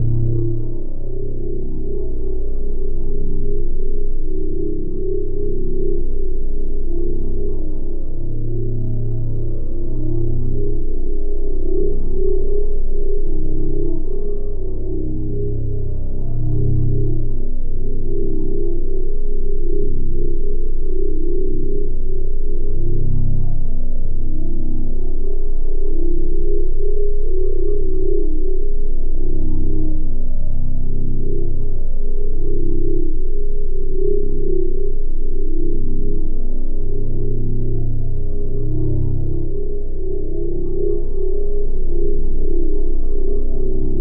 An ambient sound for the sokobanned project. You can use it if you want :)